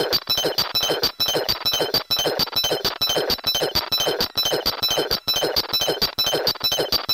Freya a speak and math. Some hardware processing.
circuit-bent, glitch, speak-and-math